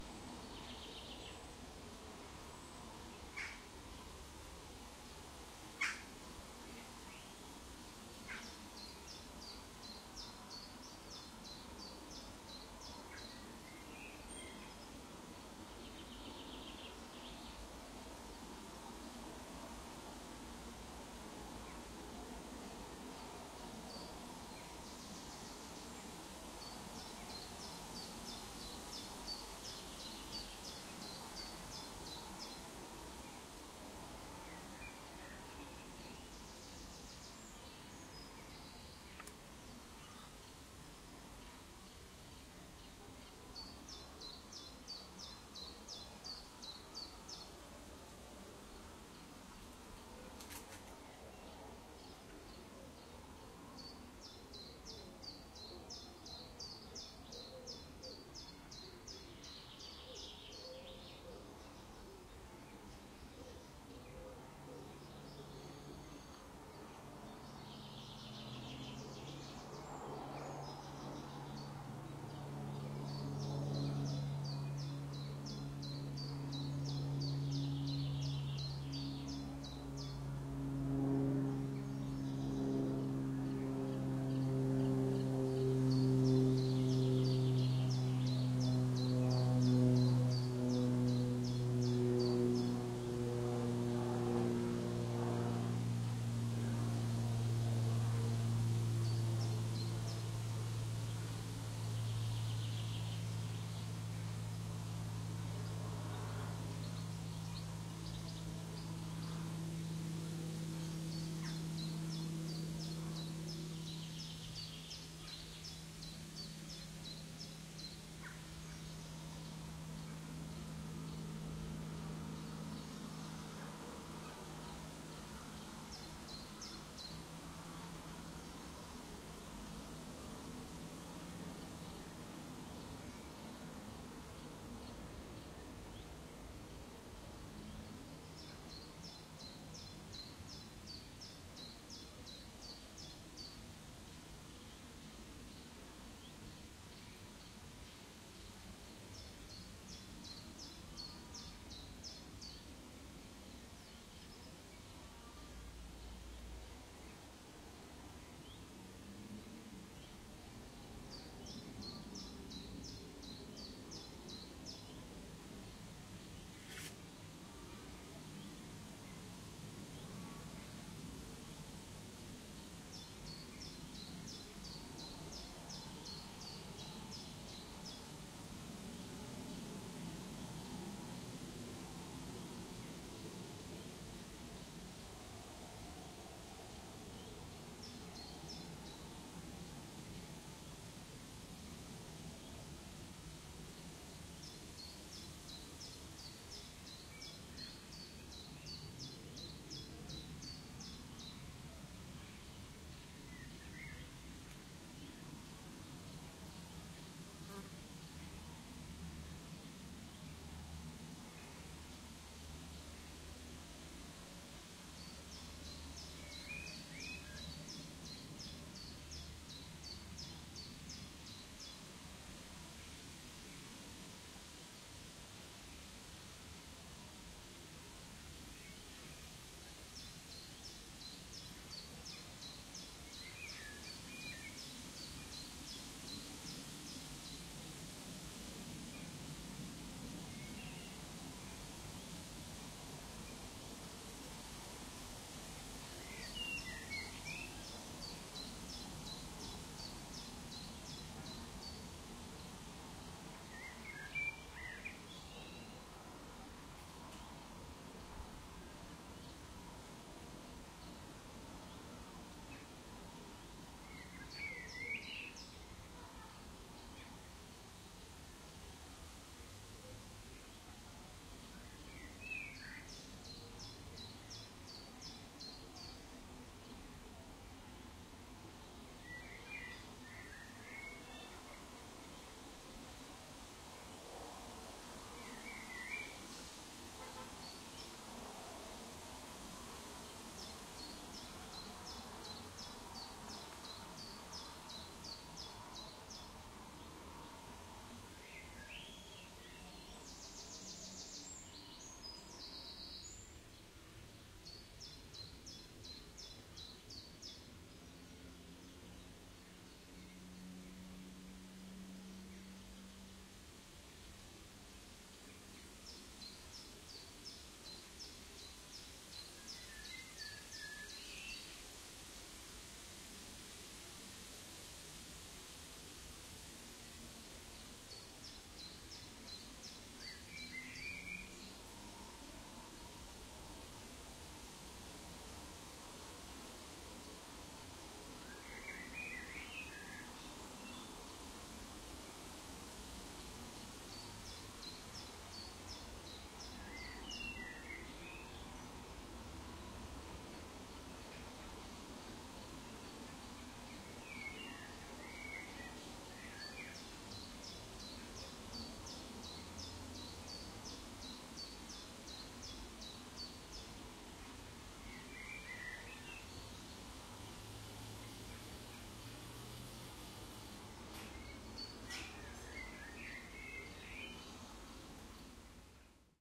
Woodland Ambience Sound Effect - Duddingston Village
Woodland Ambience Sound Effect Forest Background Nature Sounds Of Edinburgh. Recorded at Duddingston Village
Sights and sounds of this natural woodland scene in Edinburgh and its ambience recorded live on site and which includes the sounds of a trickling stream and various birds native to the area. This was filmed during Summer morning in June, 2018 in the lush woodlands of Duddingston Village. It's one of my favourite places to visit and most of my nature videos are recorded here.
Album: Nature Sounds Of Edinburgh Series 1 (2018)
ambiance, ambience, ambient, birds, birdsong, calm, field-recording, forest, general-noise, nature, nature-ambience, peaceful, Scotland, spring, white-noise, woodland, woods